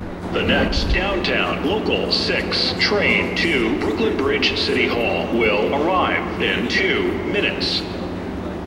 subway - downtown local 6 announcement
Announcement of next downtown 6 train from Union Square subway, New York City. Recorded on Zoom H1
NOTE: All of my sounds can be used for whatever purpose you want. It if makes you a millionaire, that's great!
new, mta, york, train